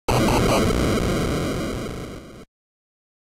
8-bit explosion
A video game sound effect made with Famitracker that could sound like an explosion
blast, explode, explosion, game, kaboom, old, powerful, retro, video